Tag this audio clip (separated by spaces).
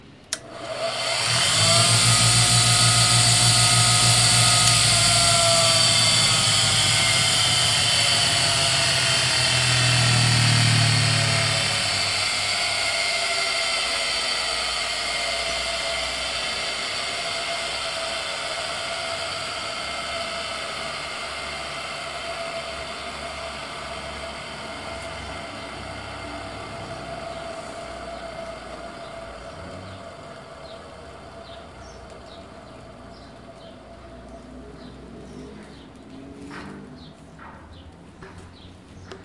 esmeril grinding machine motor mquina